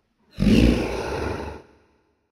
This is an accidental result from playing with Pro Tools tonight. I think this is some kind of zombie or monster's roar? Or probably even lion's.